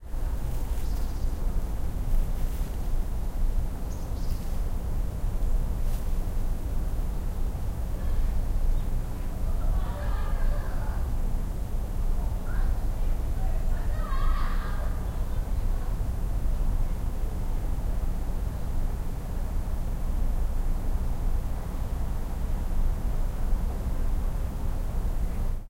0055 Birds and children
Birds and children shouting. Background noise
20120116
birds, field-recording, korea, korean, seoul, voice